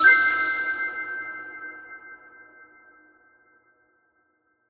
Collect Crystal
A sound that can be used when the player collects particular item
Collect, Crystal, GameDev